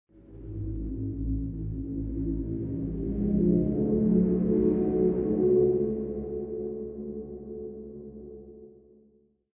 Ghost Voice 2
Another ghost voice created from a standup bass sample session.
horror, eerie, halloween, haunted, ghost, voice